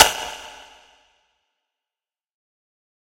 ui misc1
A small random synthy hit.